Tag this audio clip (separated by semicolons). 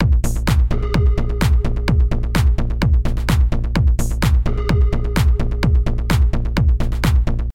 bass; beats; cave; dance; dark; music; sonar; sound; trance